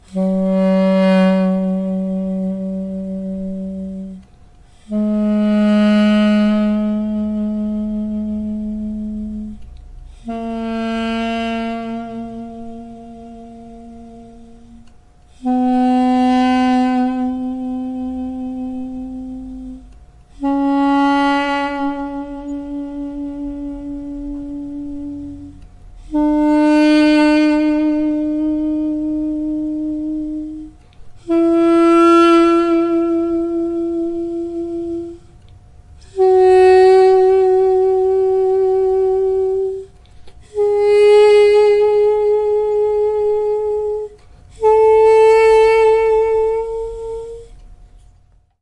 Scale by Duduk - Armenian double-reed wind instrument
Recorder: Zoom H4n Sp Digital Handy Recorder
Studio NICS - UNICAMP
escala, armenia, duduk